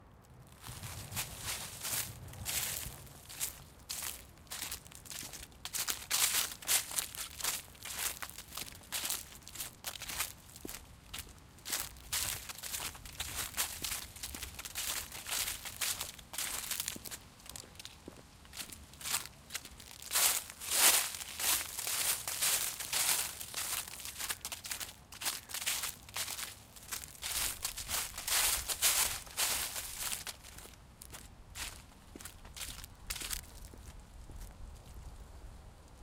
walk autumn leaves
Walk through the autumn leaves.
Recorded 2012-10-13.
Autumn, city, leaves, noise, town, trees, walk